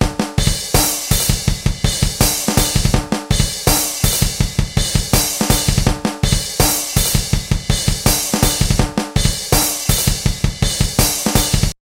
Drum loop 8
Made with CausticOSX. Used in a remix. Please tell me where you used it! Tempo is 82.
dance, electro, electronic, loop, remix, beat, dubstep, drum, loopable, synth, trance, realistic, tamax, techno, bass